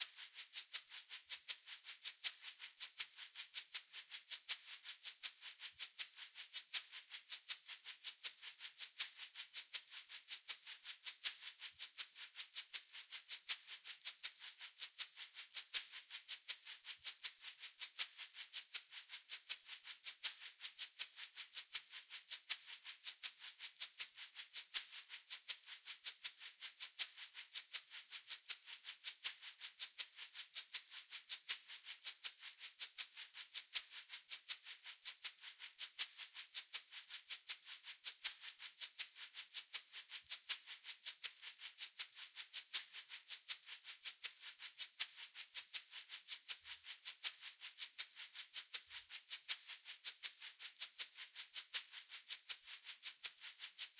Song7 SHAKER Fa 3:4 80bpms
80, blues, Chord, beat, Fa, HearHear, Shaker, loop, rythm, bpm